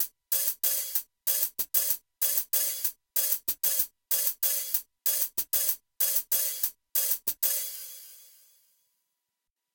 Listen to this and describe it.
High hat loop in 3/4
hats, percussion, high, drums